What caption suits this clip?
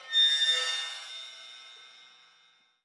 Sliding drumstick across cymbal
Using the tip of a drumstick to slide across a cymbal to create these sounds. Very strange and nasty inharmonics grow.
Beautiful.
bronius, creepy, jorick, horror